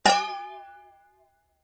a little punch to a metallic water boiler.
n, pressure, golpe, frecuencies, olla, ondulating, hit, percussive, metal, metallic, percussion, presi